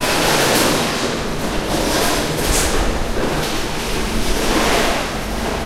At the punt de reciclatge on Ramon Turro in Barcleona. Standing just inside the warehouse door as they sorted the waste with their machines. This is a short percusssive section of the soundscape.
Recorded on a Tascam Dr-2D.
Dr-2D, ecological, Campus-Gutenberg, Tascam, percussive, machines, Engineering, technology, industial, recycling